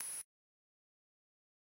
Viral Hi Hatter 03
hi, hat